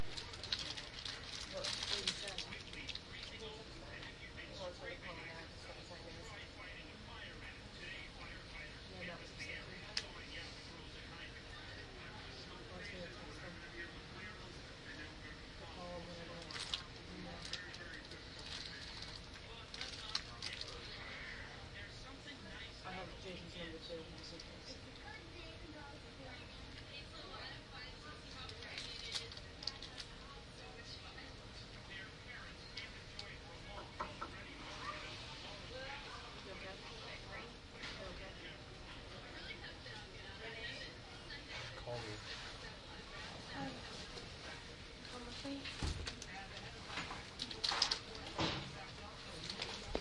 ER corner9
Sitting in a corner at the hospital emergency room recorded with DS-40.
room, hospital, ambience, emergency